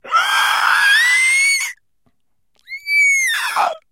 Male Inhale scream 5
A dry recording of male screaming while breathing in.
Recorded with Zoom H4n
alien, animal, creature, cry, human, inhale, male, monster, schrill, screak, scream, screech, shriek, squall, squeal, yell